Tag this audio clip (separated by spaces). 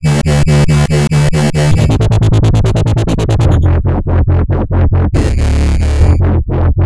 wobble bass dubstep loop